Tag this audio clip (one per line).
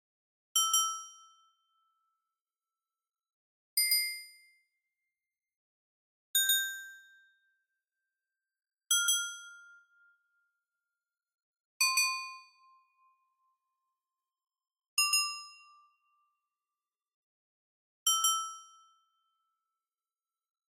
clang
cathedral
bells
ringing
dome
chime
gong
clanging
ding
church-bell
dong
clock
church
ring
bell
10
strike
metal